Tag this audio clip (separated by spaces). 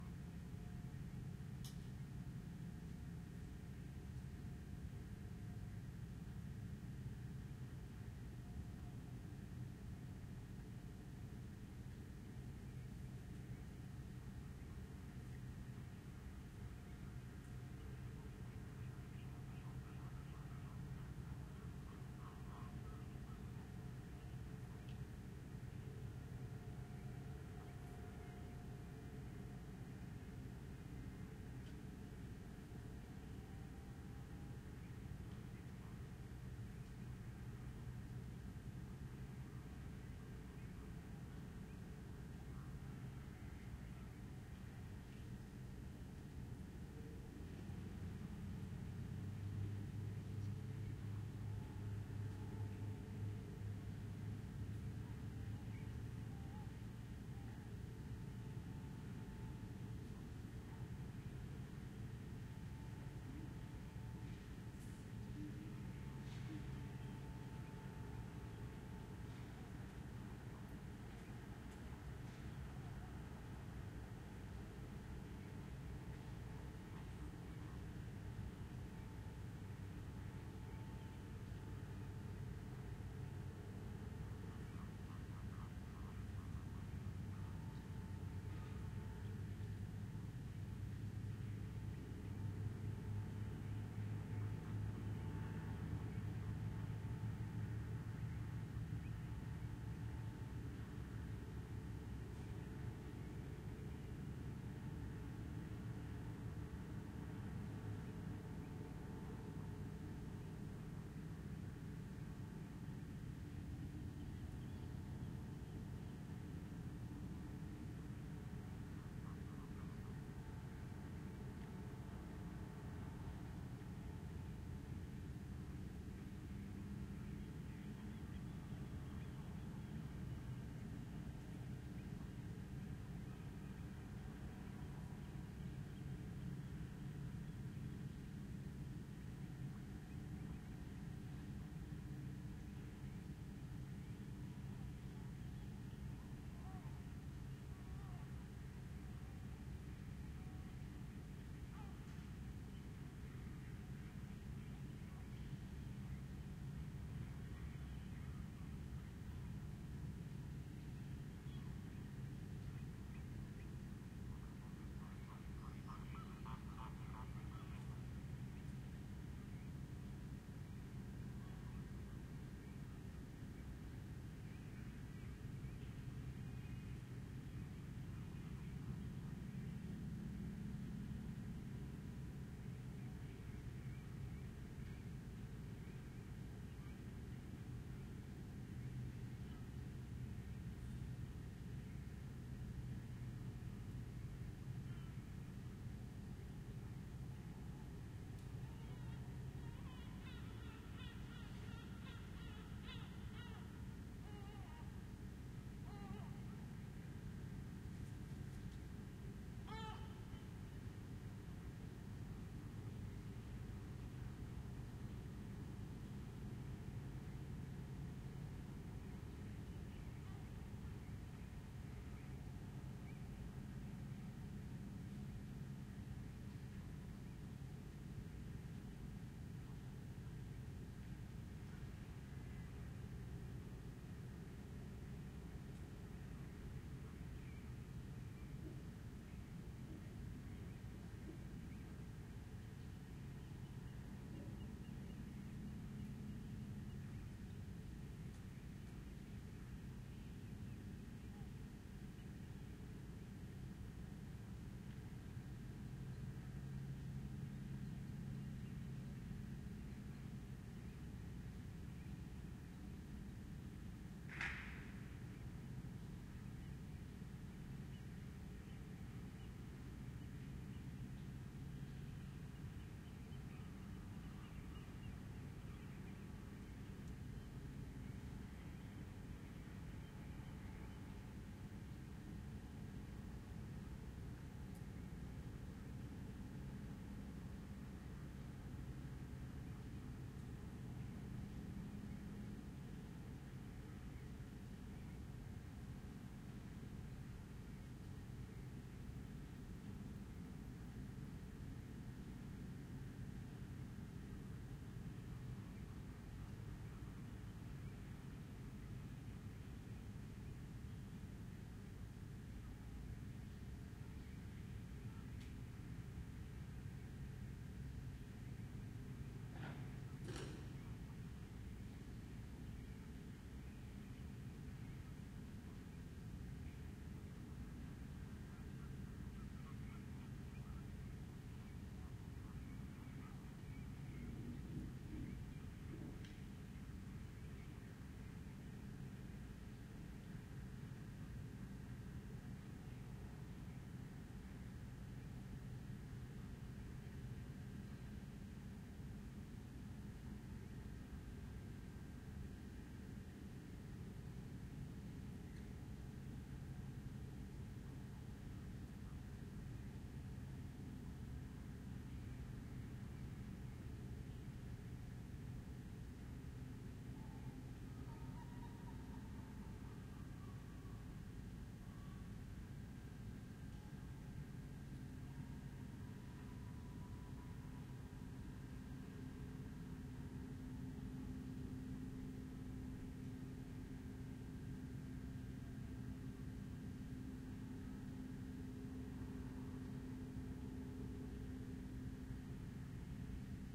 laguna ambience air-conditioning venice drone boat machinery noise field-recording birds night city